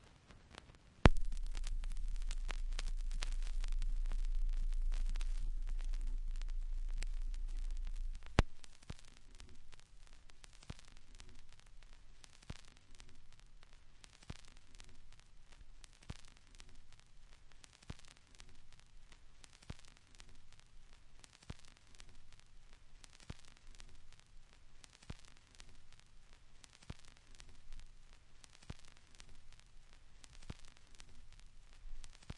In an attempt to add warmth to my productions, I sampled some of the more distinctive sounds mostly from the lead-ins and lead-outs from dirty/scratched records.
If shortened, they make for interesting _analog_ glitch noises.
crackle, dust, hiss, noise, pop, record, static, turntable, vinyl, warm, warmth